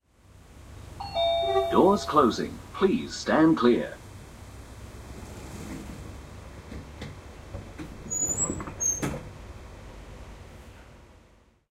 train door close 2a (no beeping)
The sound of an electronic door closing with a warning announcement and the beeping removed. Recorded with the Zoom H6 XY Module.